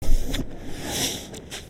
Power up sound for space ship waste systems. Created for a game built in the IDGA 48 hour game making competition. The effect is based around a reversed sample of a car door strut recorded using a pair of Behringer C2's and a Rode NT2g into a PMD660.